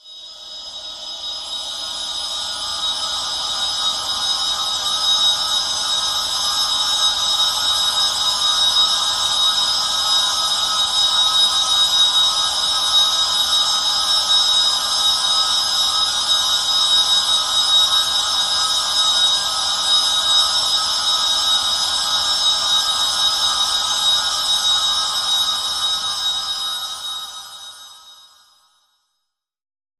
A high, intense ringing drone.